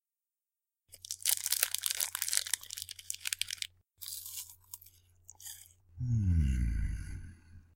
Candy Bar Crunch
Me unwrapping a candy bar and enjoying it! Effects: noise reduction, vol. envelope, and pitch bend reduction. Recorded on Conexant Smart Audio with AT2020 mic, processed on Audacity.
snack, treat, yummy, snacking, satisfied, hungry, candy-bar